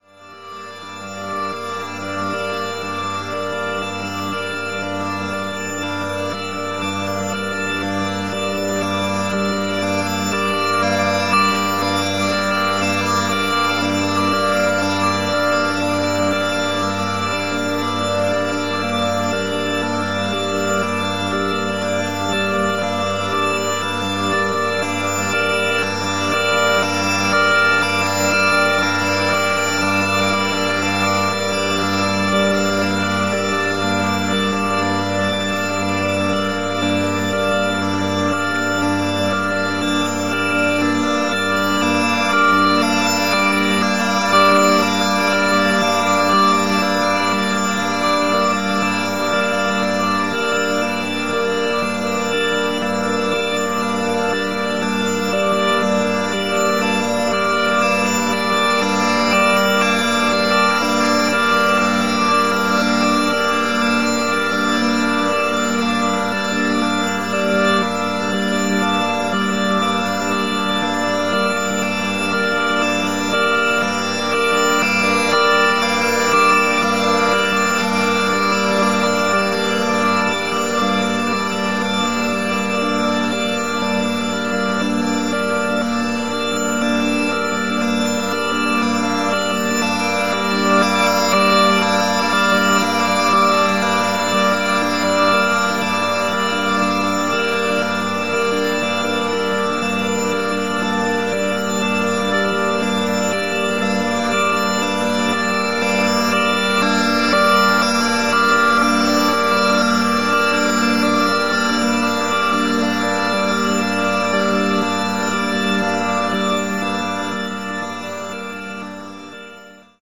1.This sample is part of the "Padrones" sample pack. 2 minutes of pure ambient droning soundscape. Beautiful atmosphere.